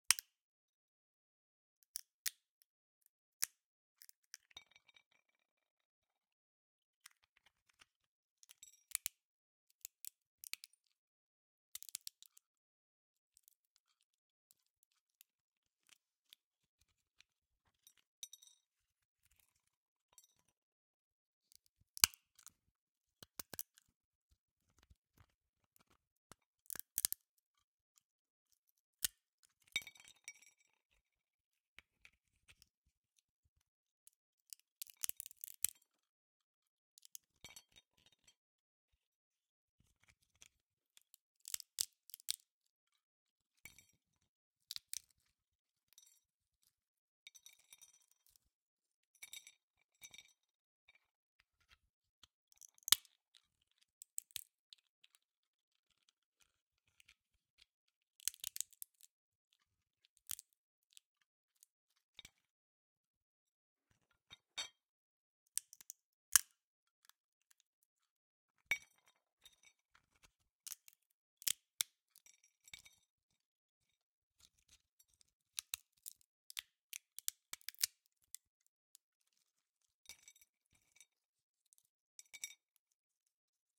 20180421 Cracking Nuts
click, cracking, clicking, food, crack